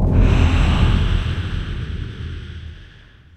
Created entirely in cool edit in response to friendly dragon post using my voice a cat and some processing.
dragon, processed